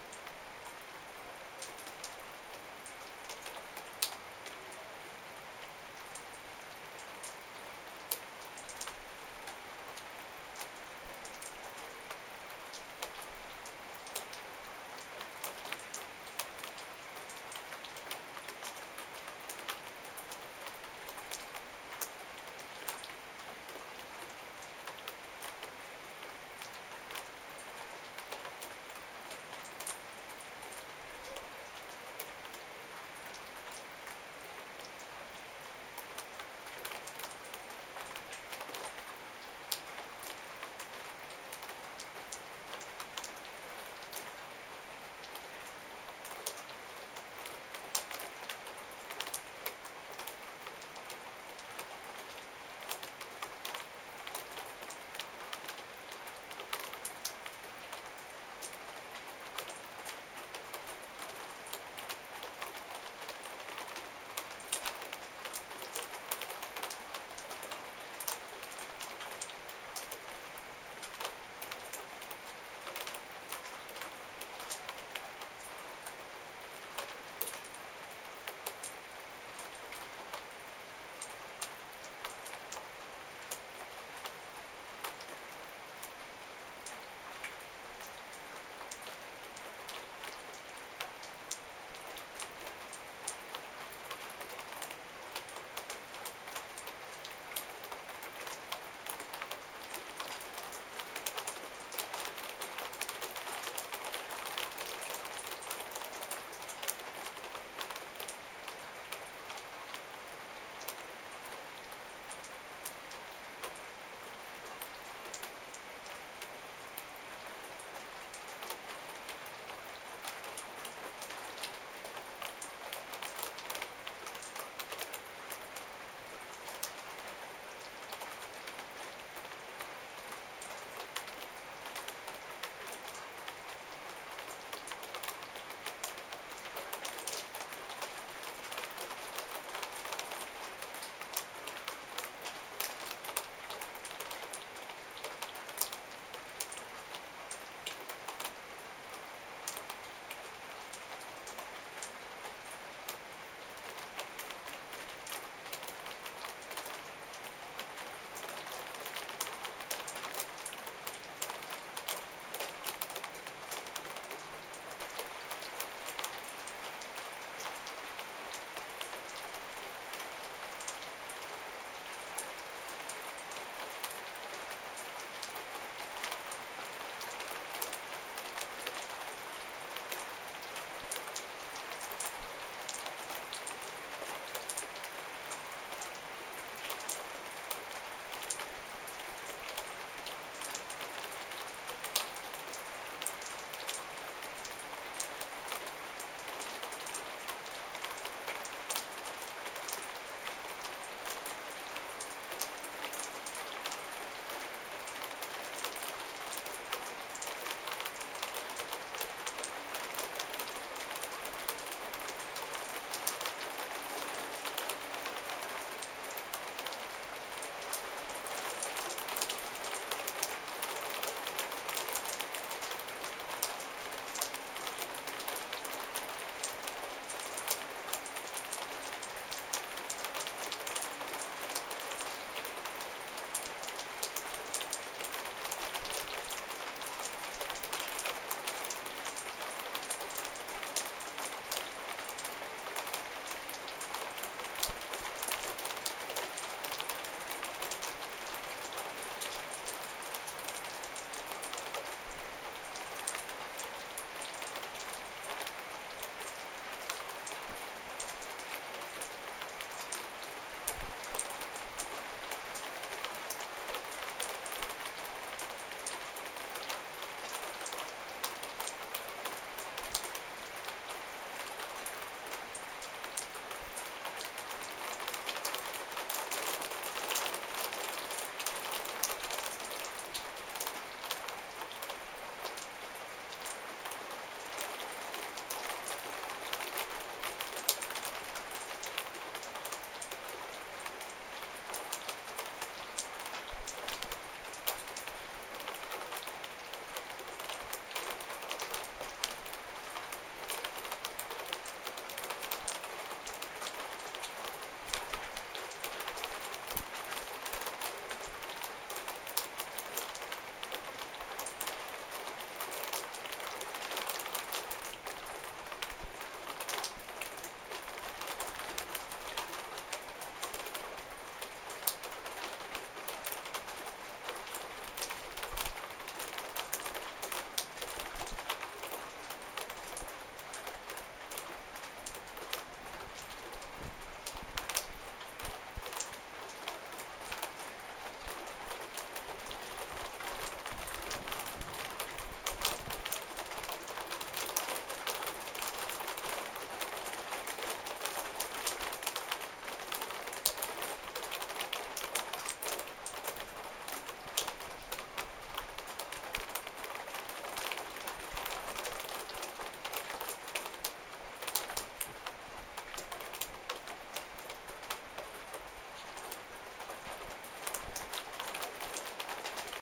Rain on Veranda
Rainfall on a wooden veranda. Light and Medium. Minimal background sound, suburban.
Rode NTG 5
porch, rain, patio, rainfall, shower